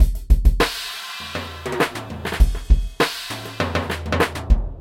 100 BMP Insistant drum fill mix
Frenetic Brush Beat 100 BPM Created with DP & MACH 5
bpm, beat, frenetic, 100